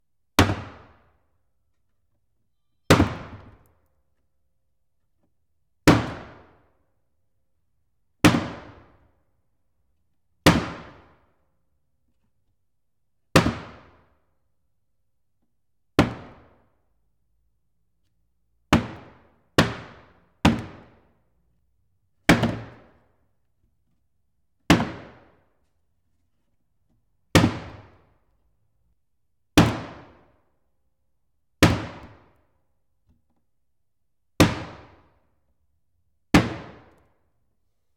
Wood Hit 01
Nice solid, percussive hits on plywood. Recorded in a 28,000ft³ shop hence the natural reverb.
Rode M3 > Marantz PMD661.
bang, boom, drop, explosion, hit, impact, percussion, percussive, pop, pow, shield, smack, strike